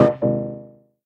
Short discrete error sounds, could be used for game sounds.
blip, menu, button, clicks, bleep, wrong, sfx, click, fail, error